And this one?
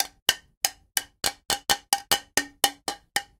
pvc pipe on glass bottle
Hitting a glass bottle with a pvc pipe.
glass, impact, plastic